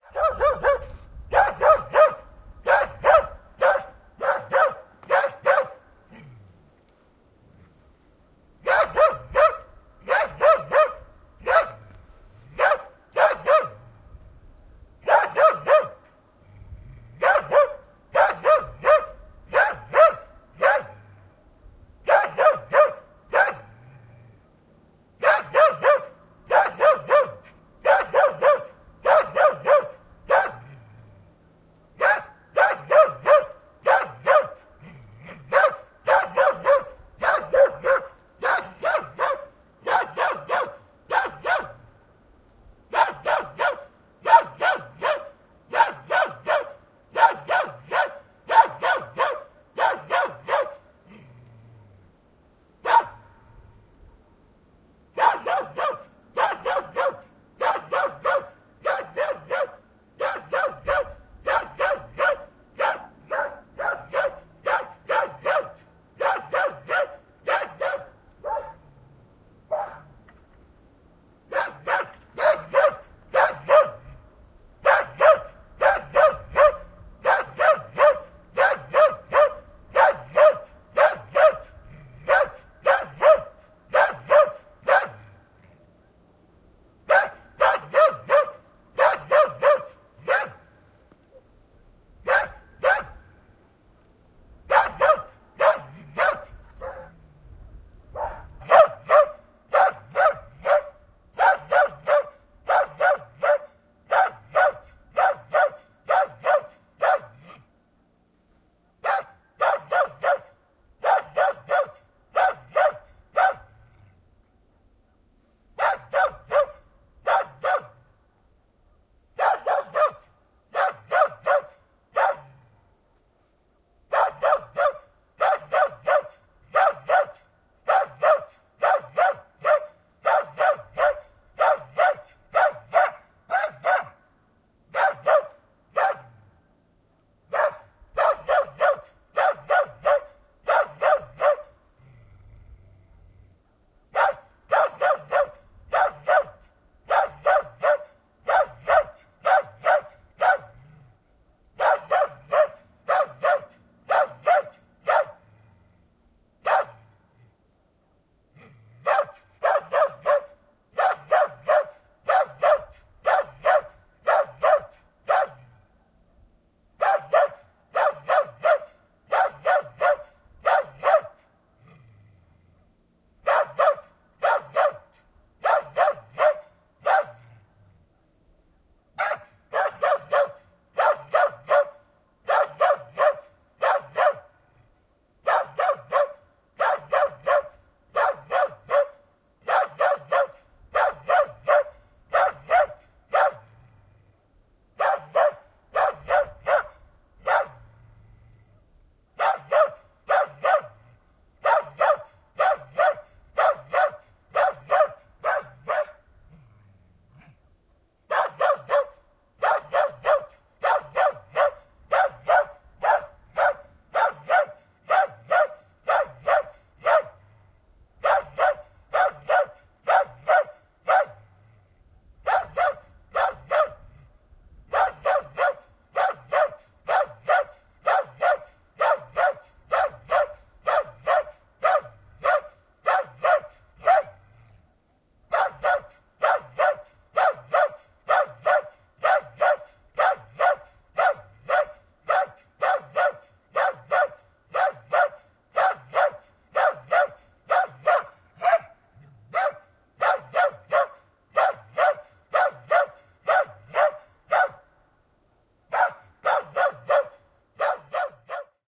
Koira haukkuu, sekarotuinen / Cross-bred dog barking in the barnyard
Sekarotuisen koiran kiivasta haukuntaa maalaistalon pihalla.
Paikka/Place: Suomi / Finland / Kitee, Kesälahti
Aika/Date: 28.11.1989